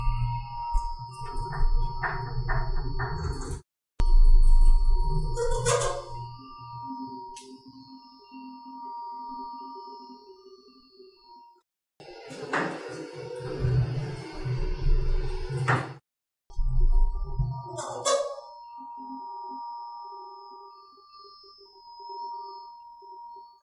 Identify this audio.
Elevator Sounds - Elevator Moving
Sound of an elevator moving